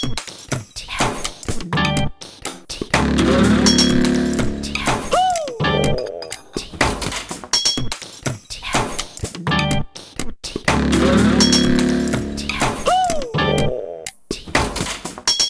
Human funk 2
The sounds in this loop are not edited, only volume and/or length, so you hear the raw sounds. I cannot credit all the people who made the sounds because there are just to much sounds used. 124BPM enjoy ;)
weird, strange, human, loop, groove